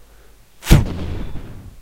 An energy effect inspired by anime Fate/Zero or Fate/Stay Night series.